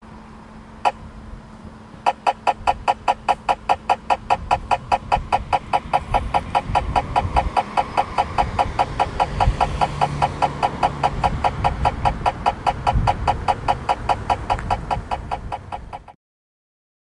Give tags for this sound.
Crosswalk H2n Street Traffic-lights

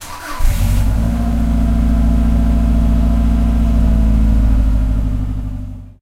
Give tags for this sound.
car subaru-liberty field-recording fx

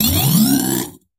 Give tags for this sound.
Grate Rub Grind Metal Screech Scrape Effect Scuff Sound Scratch

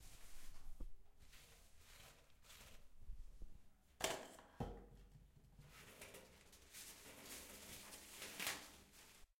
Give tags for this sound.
restroom toilet interior bathroom roll paper h6 OWI

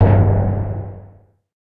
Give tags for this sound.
awesome drum epic nice timpani tom

